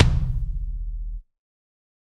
Kick Of God Wet 021
drum, drumset, god, kick, kit, pack, realistic, set